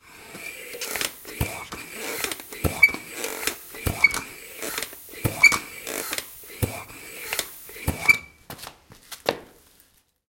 inflating-tires
On the recording you will hear small tire inflated by the pump.